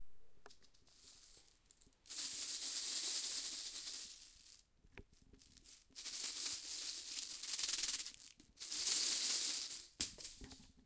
Strange rattle
This is my rattle which I got when I was a child, in the party for kids. It is a nice souvenir of this times. It was recorded in a small room with Zoom H2N (XY).